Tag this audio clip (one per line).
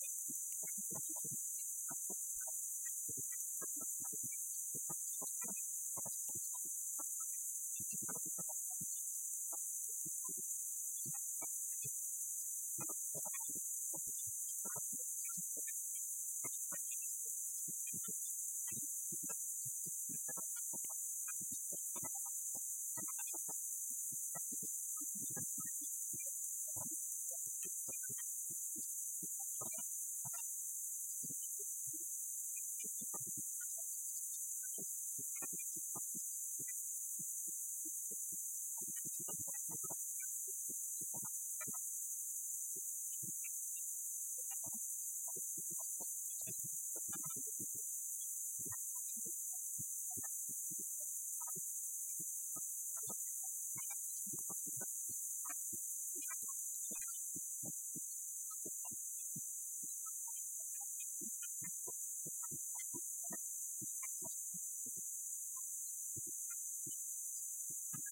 machine
industrial
mechanical
POWER
Hum
machinery
field-recording
MOTOR
Operation